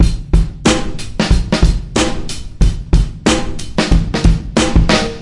hiphop mpc-sp 2
beat rework witk fl studio sequencer + a snare . 92 bpm
Adobe audition for reverb
beat for sampler (mpc,sp,...)